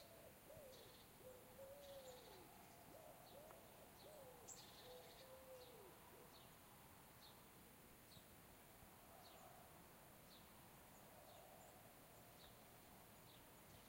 5.30-clock-early
530, clock, early